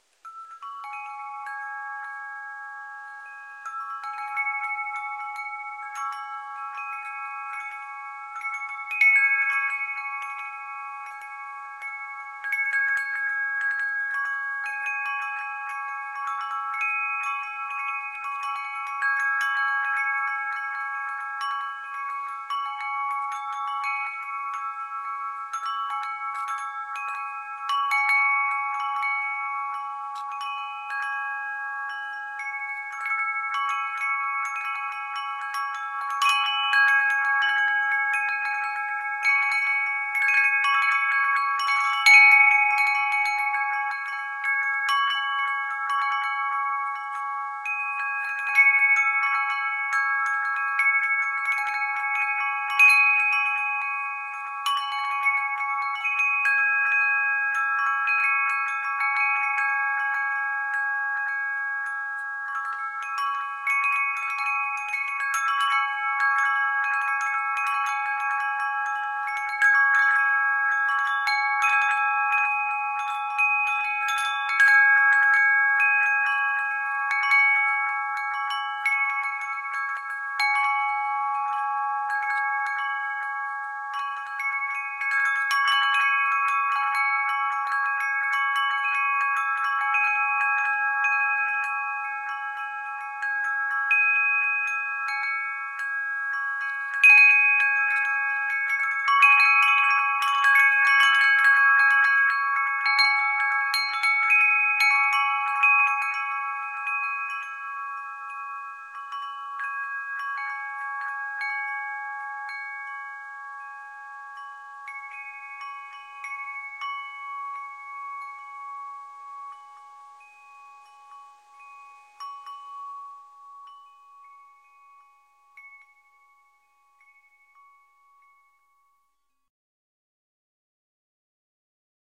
barneys chimes
Windchimes
Recorded on Zoom H4n
bells, chimes, magical, windchimes